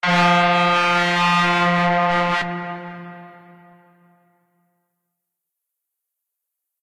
Truck Horn Long Length
I created this big truck sound by tunning a virtual electric guitar program. I feel it sound more good for horror genre. Its all free enjoy.
FREE
Big-Truck, Press-Horn, Transport, Truck, Long, Semi-Truck, Longer, Big, Trucker